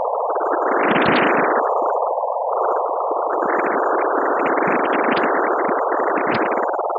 Same idea as for my Iteration Project 1 sample pack, but this time the starting point is the picture from someone else's sound and then iterating the sound-to-image-to-sound process.
This sample is the conversion to sound from the image of this sample:
Used Nicolas Fournell's free Audiopaint program to convert from pictures to sound. The starting sound was approx 7s in lenght, so I will use that. Min freq 50Hz, max freq 10,000Hz.
Left channel volume was a bit weak so boosted it up.